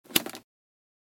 GPS being un-plugged from 12V port in a car.
electricity, gps, plug